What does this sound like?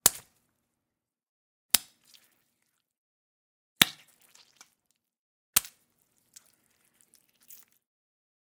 Impacts Splatter Orange 001
A series of slushy, splattery impacts made by punching oranges. Great for fleshy, crunchy, disgusting moments!
blood, bones, crunch, flesh, fruit, gush, guts, human, impact, punch, slush, splash, splat, splatter, watermelon